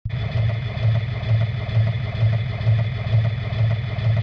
Dirty rewinder
Recording from a fork crashing in a metal pan. Looped, distorted and equalized.
factory, Synthetic, Strange, Machinery